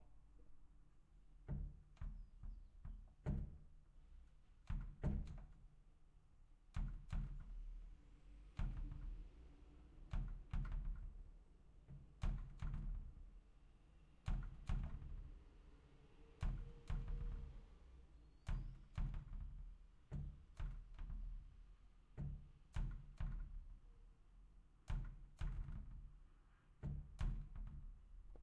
160170 Wind Blowing door OWI
The sound of the wind blowing a door
Door, Heavy, Wind